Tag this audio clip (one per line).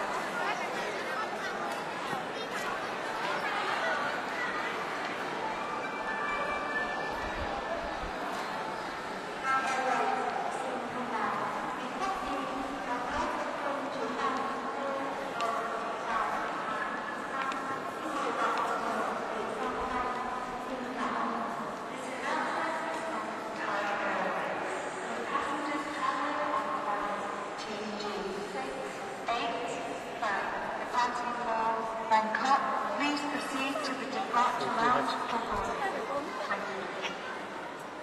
ambient
hanoi
field-recording
airport
vietnam